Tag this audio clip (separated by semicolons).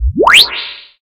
short; button; switch; ambient; synthetic; press; hi-tech; click